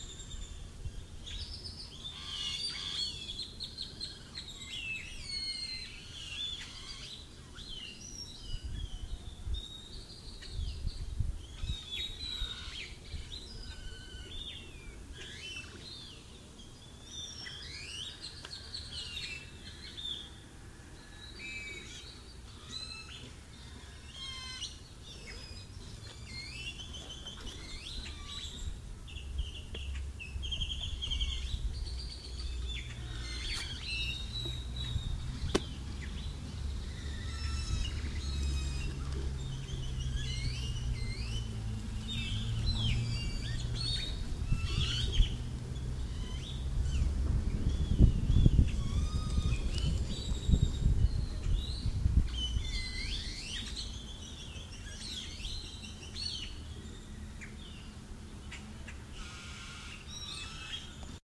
Recorded using an iPhone 3G voice recorder sitting in the center of the plaza at La Cruz de Huanacaxtle in Nayarit Mexico. Tropical birds in a tree to the left, a person to the right fiddles with their bag, then near the end a single small car drives around the block. About 1 minute in duration.